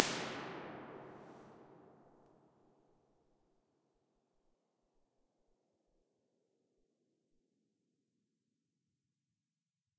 Finnvox Impulses - EMT 4,5 sec
convolution, Finnvox, impulse, ir, response, reverb